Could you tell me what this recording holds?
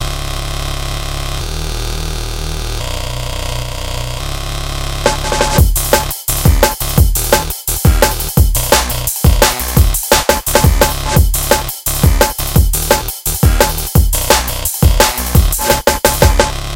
This sound was created with layering and frequency processing.
BPM 172
Key F# maj